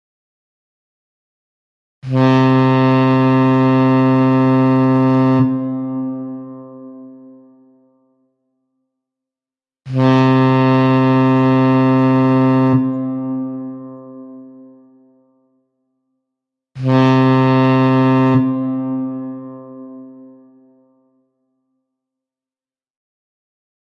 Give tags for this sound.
ship
horn

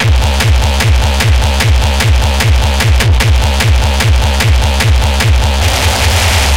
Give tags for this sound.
bass
bass-drum
bassdrum
beat
distorted
distortion
drum
gabber
hard
hardcore
hardstyle
kick
kickdrum
techno

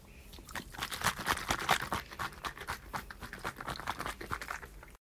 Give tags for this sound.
water
shaking
water-bottle

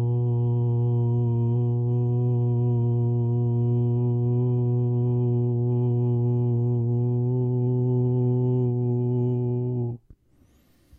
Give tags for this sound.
dry
human
male
vocal
voice

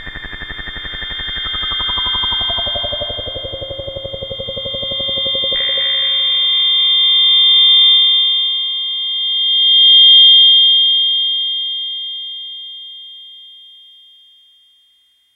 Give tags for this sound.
sound
analog
synth
circuit
hardware
electronic
noise
benjolin

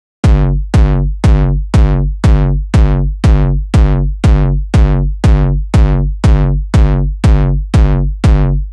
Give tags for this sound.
Hardcore
bass
Hard
beat
techno
Drum
original
loop
Distortion
noise
KickDrum
Kick
Hardstyle

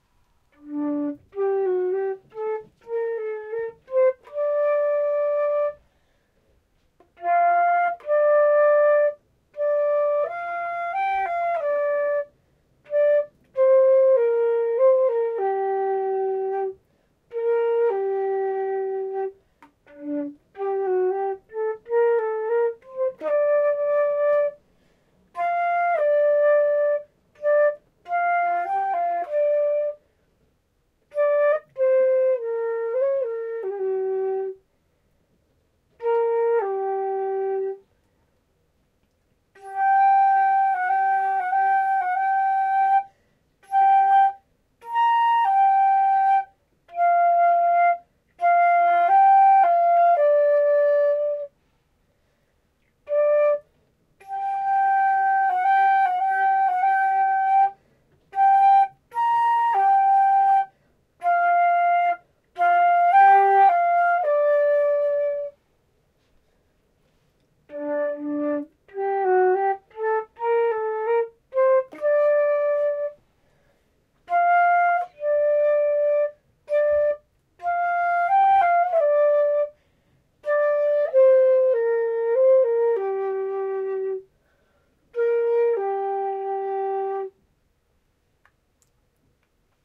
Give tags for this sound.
Classical
Flute
Imperfect
Practice
Slow

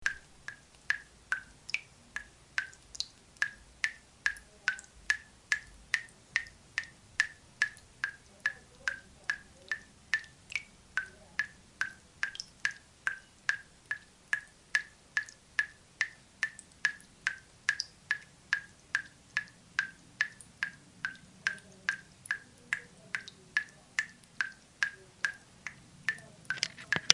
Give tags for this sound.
Drip
Dripping
Fast
Liquid
Sink
Tap
Water

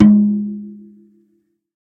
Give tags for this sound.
birch
drum
General
GM
melodic
MIDI
tom

